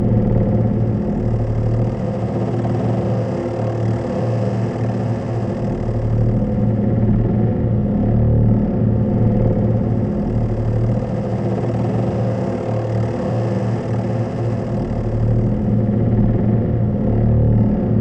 kaivo airplane
steampunk propeller plane huge